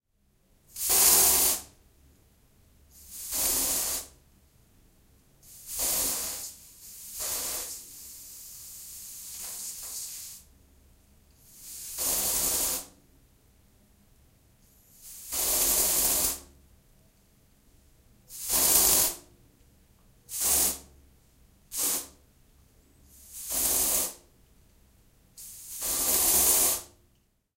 Deospray Antiperspirant
spraying several times with a bottle of deodorant;
recorded in stereo (ORTF)
deo, spray